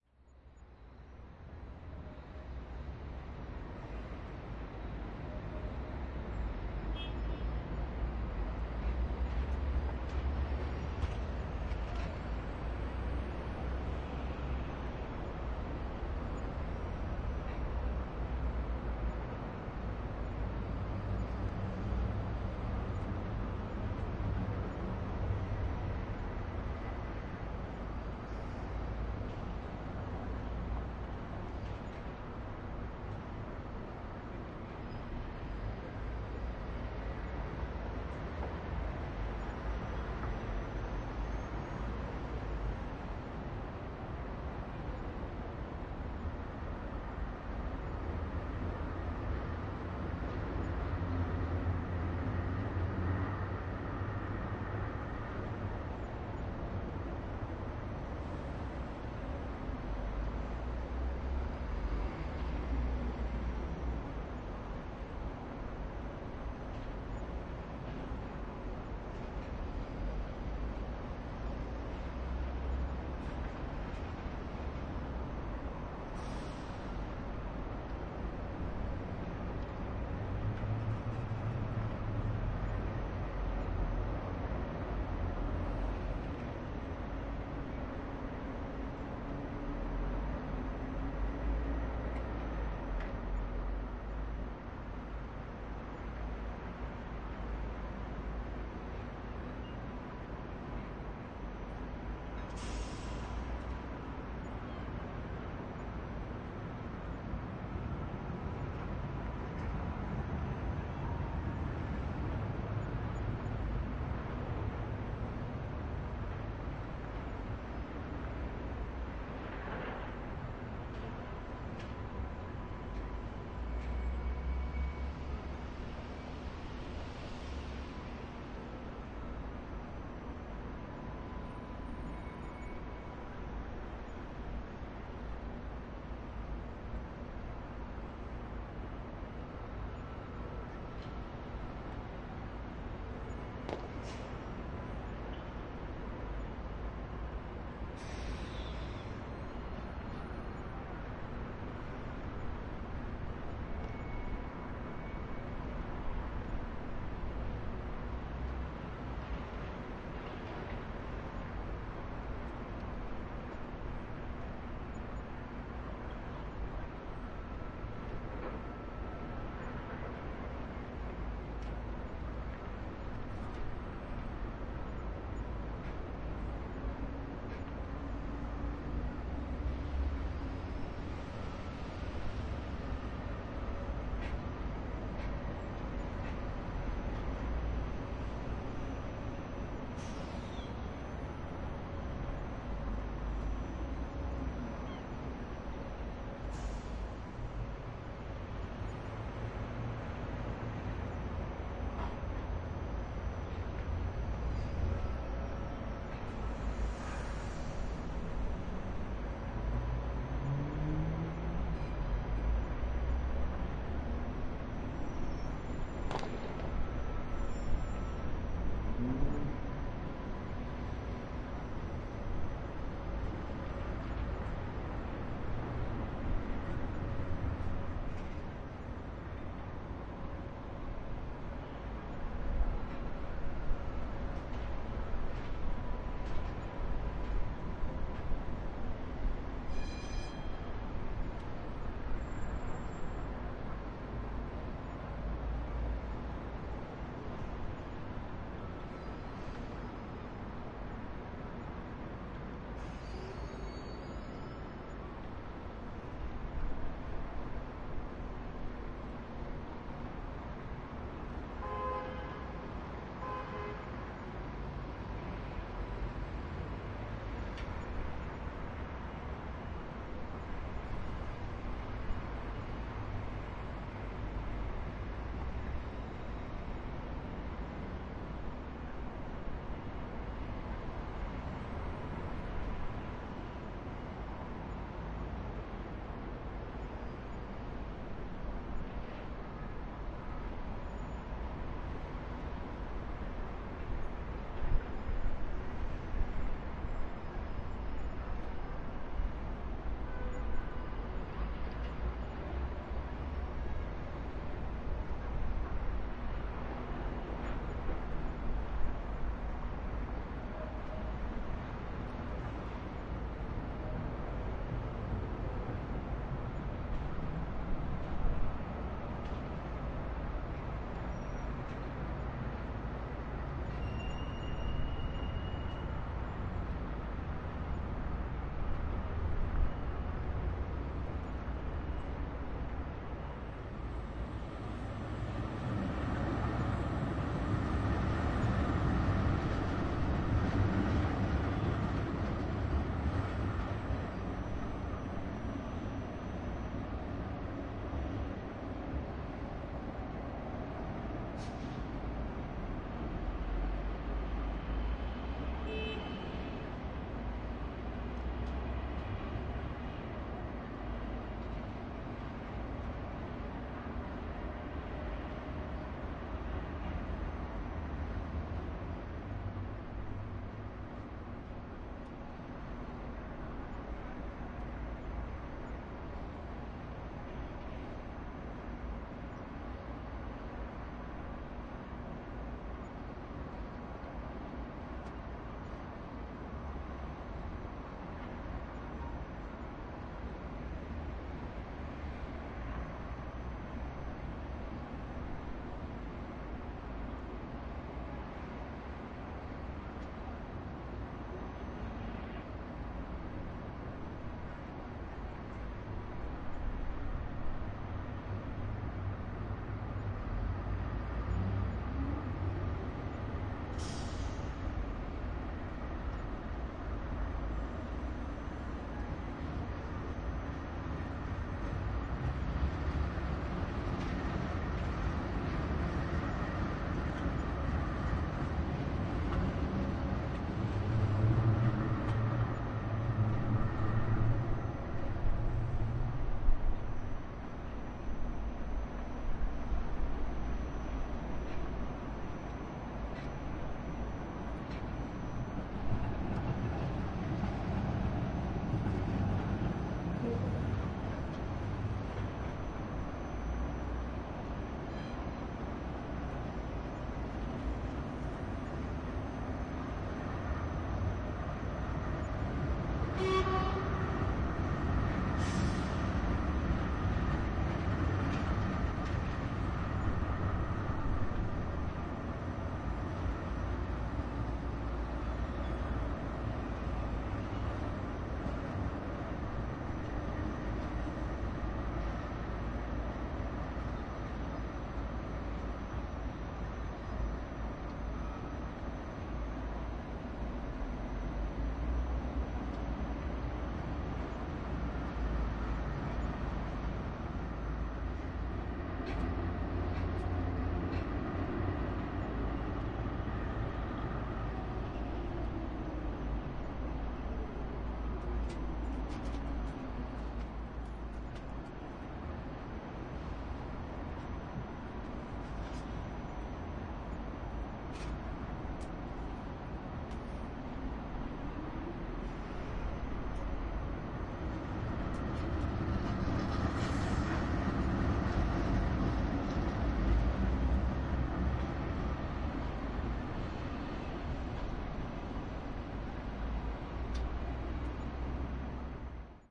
ATMOS SKYLINE CLARION MS 003
Recording from top floor clarion hotel oslo. Recording is on the terras of the suite and the icrophones is pointing towards the sentral station. I have been useing sennheiser mkh 30 and mkh 50. To this recording there is a similar recording in with jecklin, useing bothe will creating a nice atmospher for surround ms in front and jecklin in rear.